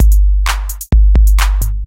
old school vintage drum